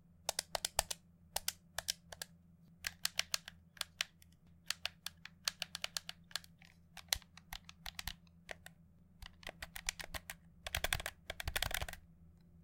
Pressing Various Buttons on PS4 Controller
Pressing all of the buttons on a Dualshock 4 controller at varying speeds and intensity.
buttons, console, controller, dualshock-4, focusrite-scarlett-2i2, gaming, joystick, mashing, OWI, PlayStation, pressing, tapping, technology